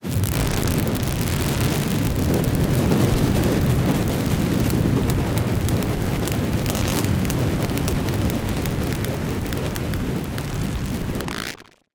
Fire - Effects - Textures - Sizzling, crackling, blowing
sizzles, flames, sparks, crackle, flame, spark, fire, sci-fi, texture, field-recording, fireplace, intense, crackling, glitch, spraying, evolving, sizzling, burning